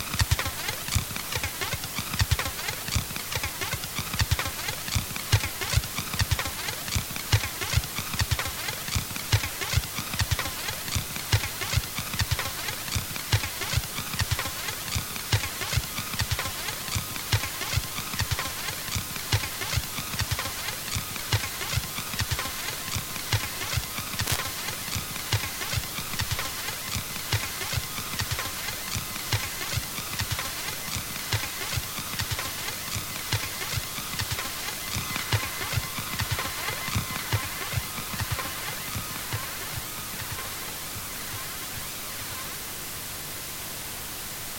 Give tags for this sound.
atari; circuit-bent; loop; noise

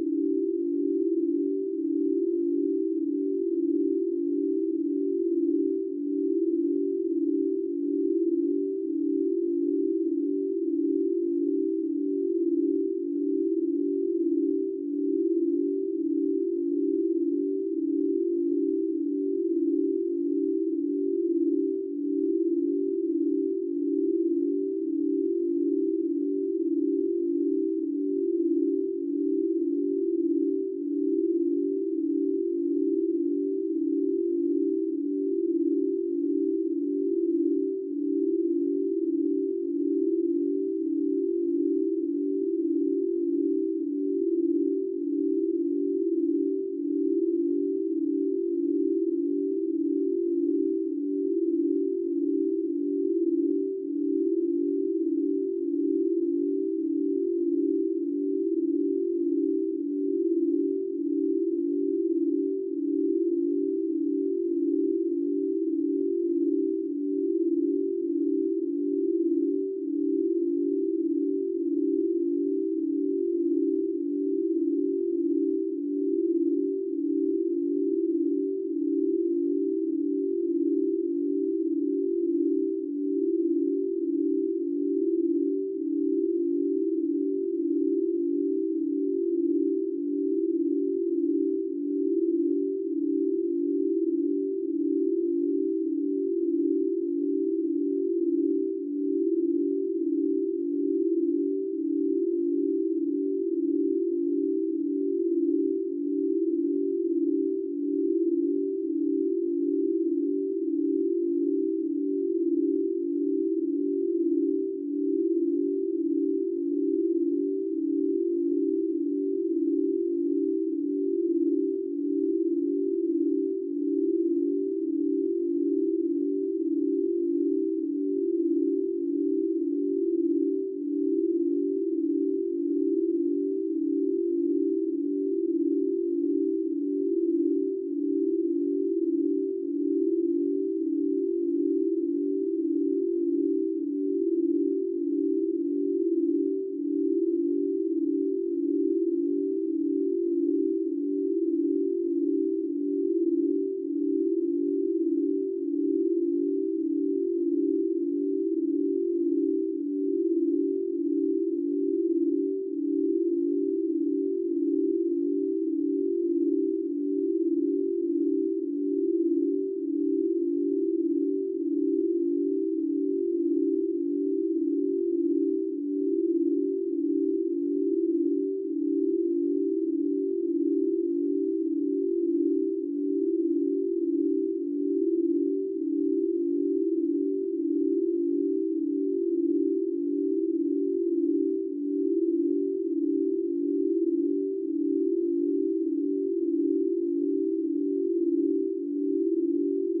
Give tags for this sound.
loop; ambient; background; electronic; pythagorean; sweet; experimental

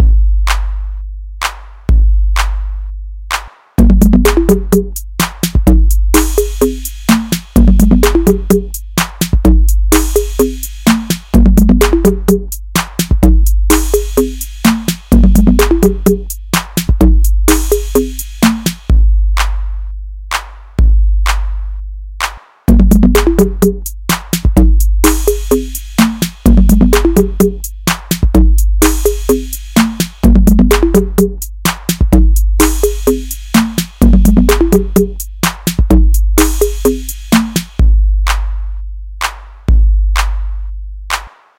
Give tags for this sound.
mpc; snickerdoodle; 127bpm; bars; 22; house; tr-808; beats

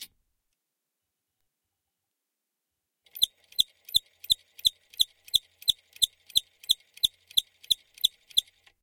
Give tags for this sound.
POWER; machinery; machine; industrial; coudre

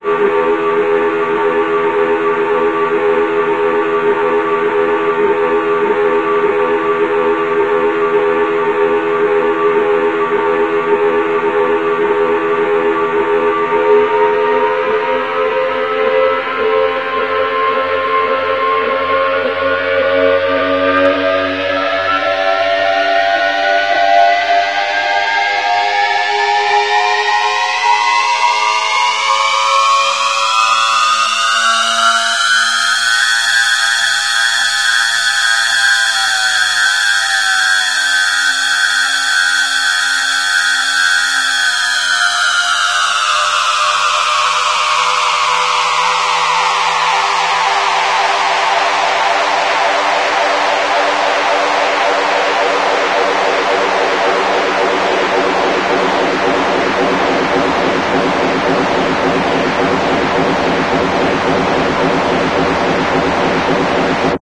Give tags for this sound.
granular; synthesis; ghost

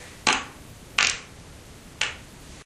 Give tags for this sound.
computer; frog

